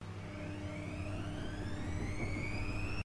washing machine E (monaural) - Spin 1
field-recording, high-quality, washing-machine